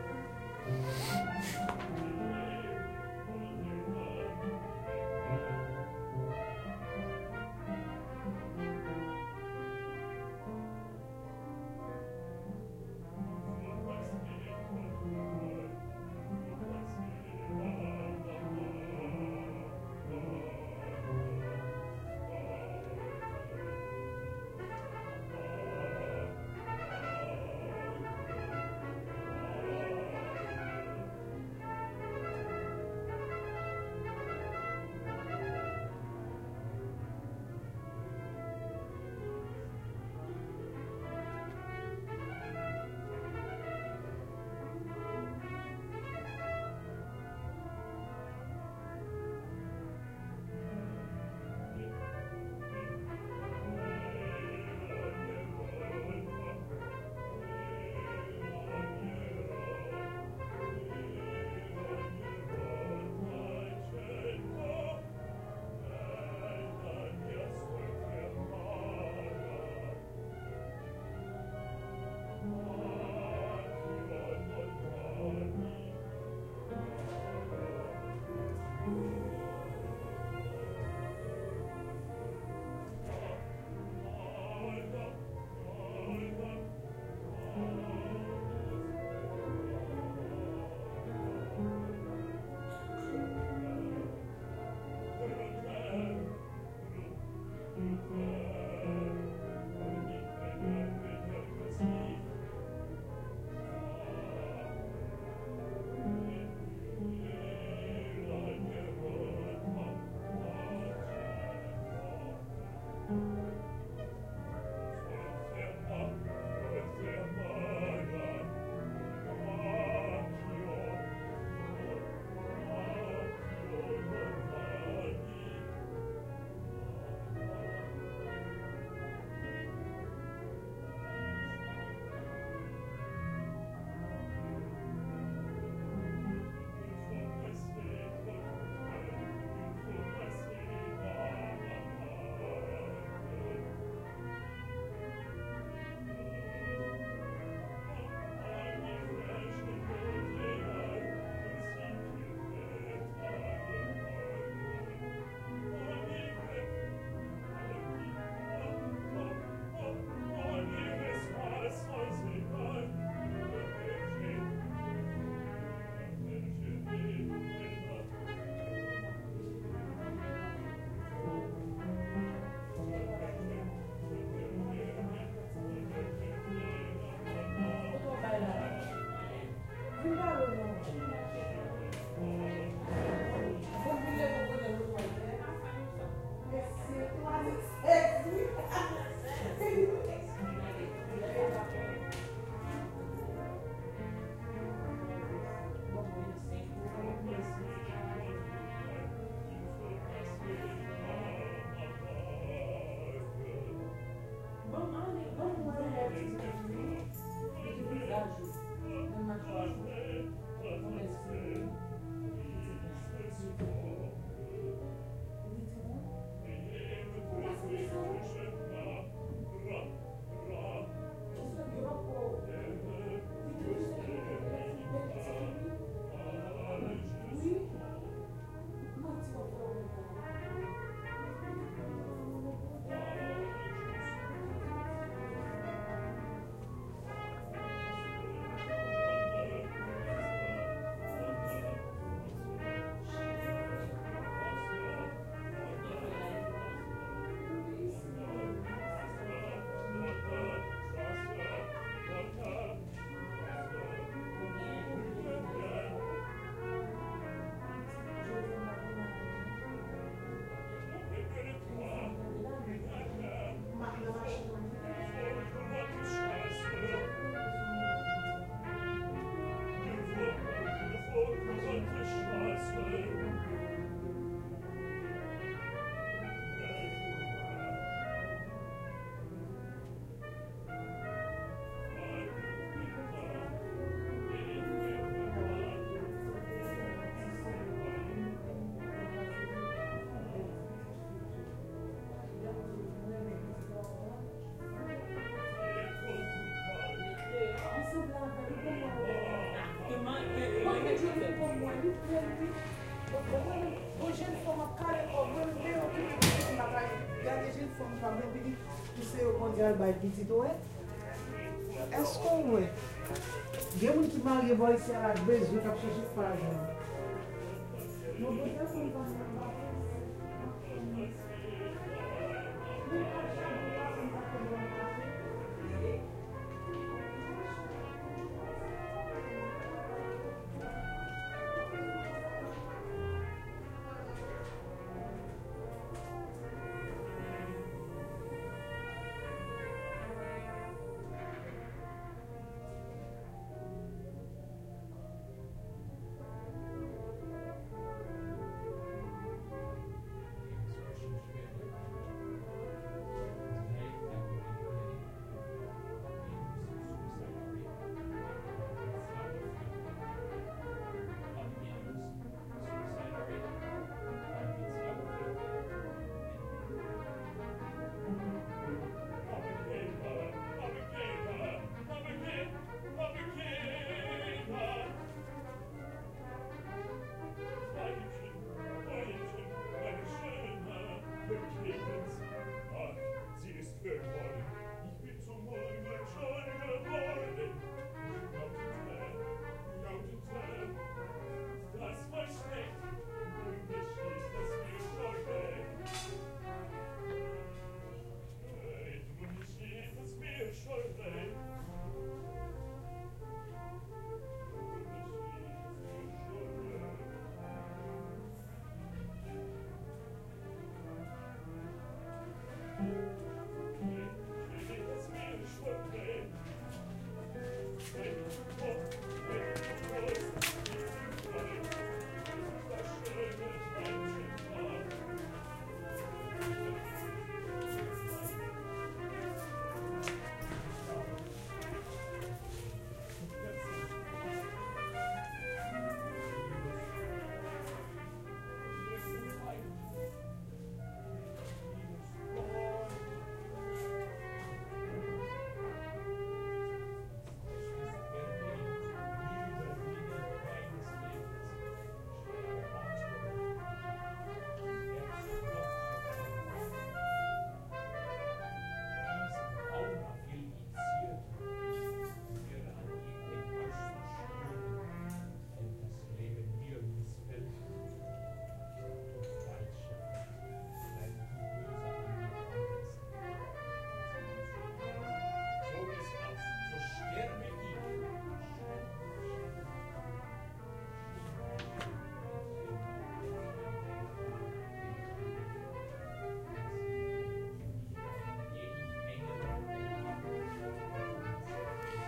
Ben Shewmaker - Foster Practice Rooms
Any music student knows this sound all too well. I recorded this outside the practice rooms in Foster at the University of Miami with a Tascam DA-P1 DAT recorder and an AT825 mic.
music, practice, school